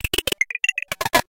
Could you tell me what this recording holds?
Some melodic and clicky rhythmic notes. Created with a Nord Modular making FM feedback processed through a gate and other manipulations.